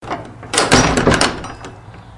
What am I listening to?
Door, Church, Close, D
Fourth raw audio of closing a wooden church door with a metal handle.
An example of how you might credit is by putting this in the description/credits:
Church, Clank, Close, Closed, Door, Doorway, Handle, Squeak, Wood, Wooden